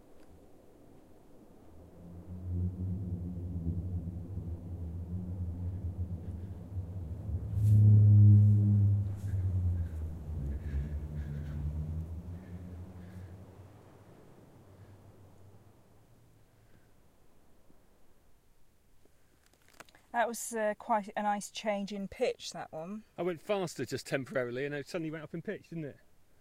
KELSOT36 dune slide with pitch change
Booming sound created via an avalanche on Kelso Dunes.
boom, california, droning, dunes, field-recording, mojave-desert, musical, sand, singing, usa